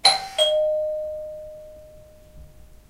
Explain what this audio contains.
a simple door bell
door, house, bell